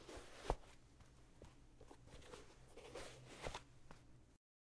putting on shoes